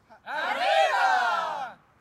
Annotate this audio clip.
Group of people - Screaming Aribaaaa - Outside - 01
A group of people (+/- 7 persons) cheering and screaming "Aribaaaa" - Exterior recording - Mono.
cheering, Group, people